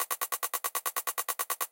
funky dirt drums